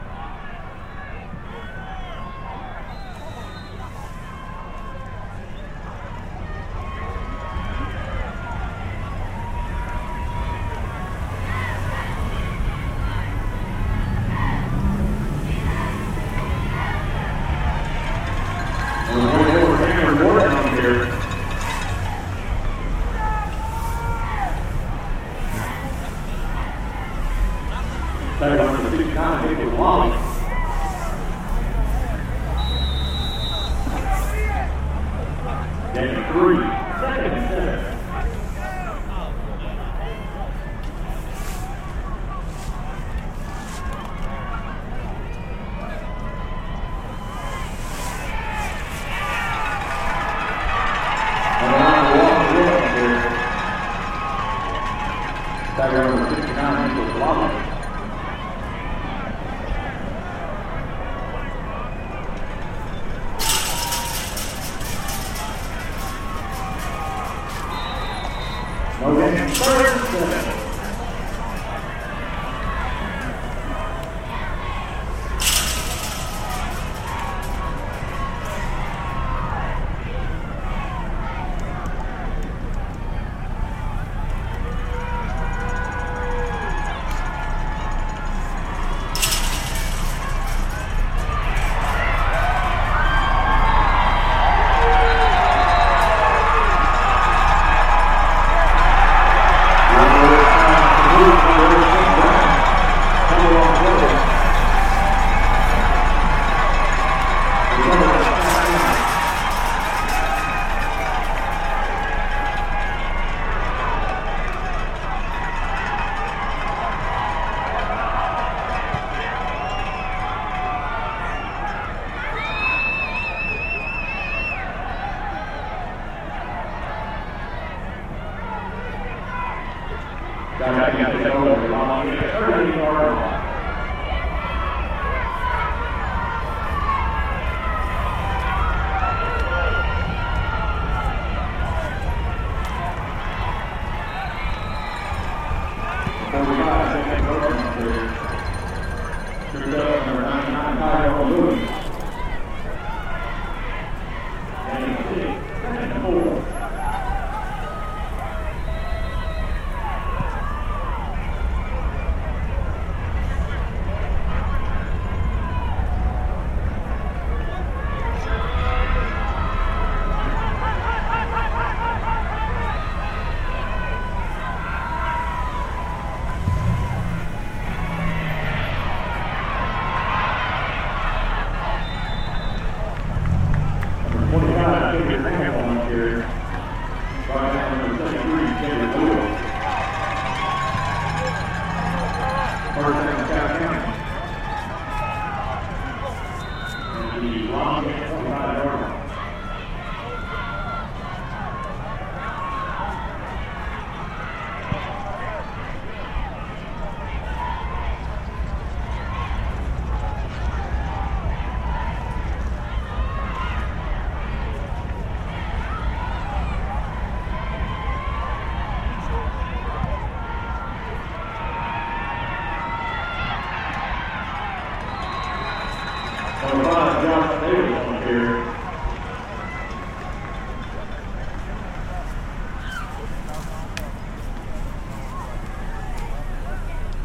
American football in Lexington, Kentucky.